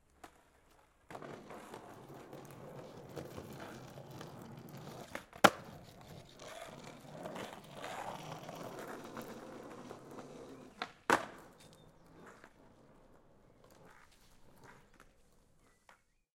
Skate pass on road jump 1
Long board stake, hard wheels. Recorded with a Rode NT4 on a SoundDevices 702
asphalt, fall, road, skate